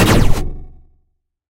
Heavy Spaceship Blaster
Rifle, Laser, Rikochet, videgame, Gun, Fire, Loud, Bang, Pew, Light, Gunshot, Shot, Shoot, Blaster, Heavy, Pulse, SciFi